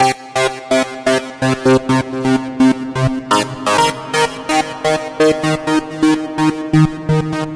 MS Gate 1
Loop from Korg MS 2000
127, 2000, bpm, c64, gate, korg, loop, ms, opera, sample, siel, sound, synth, synthie, trance, trancegate